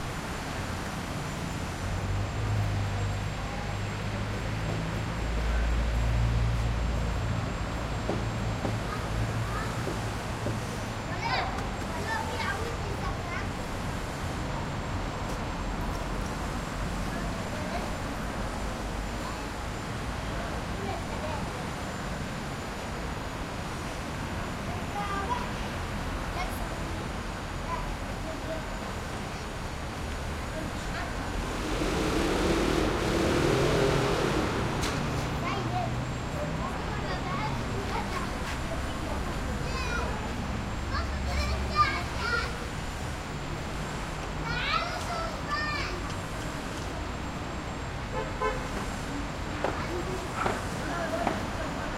side street Palestine quietish traffic ahaze, nearby construction, and children kids collecting and playing in coal Gaza 2016
traffic, kids, arabic, children, haze, side, Palestine, street, quiet, playing